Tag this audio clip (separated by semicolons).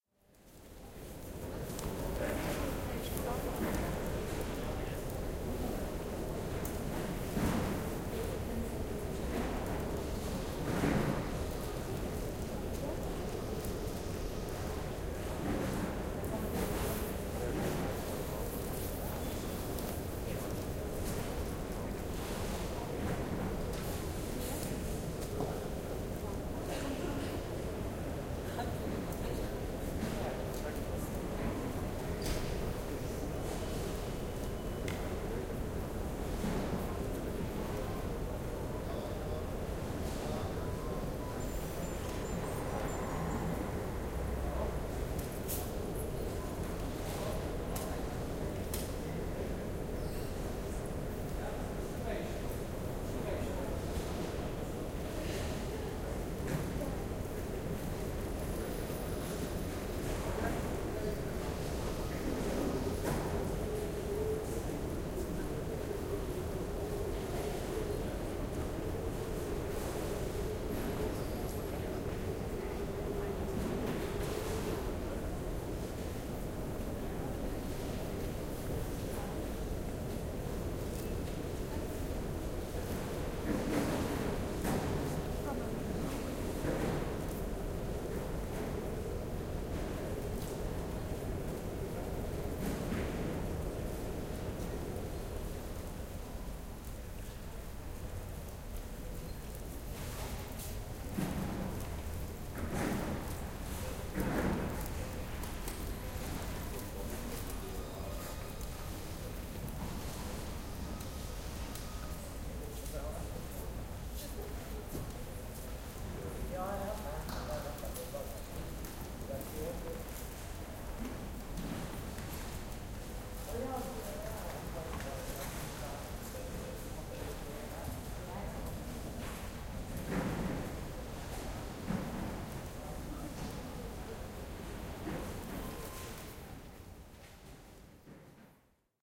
airport; ambience; general-noise; field-recording; Field; ambient; ambiance; recording